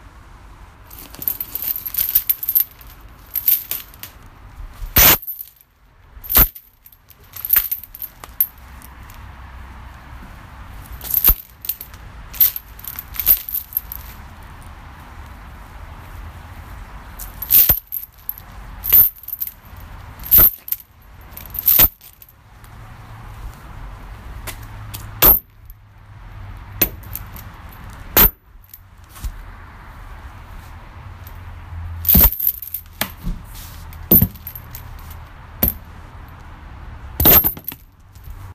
me smashing my bicycle chain against various surfaces.